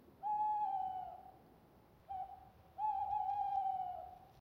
Owl Hoot
A hooting owl. Recorded with mobile phone.